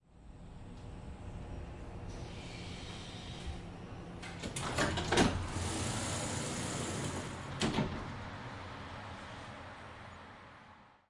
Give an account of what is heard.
tramdoors opening
Opening of an tram doors
czechtram, train, tram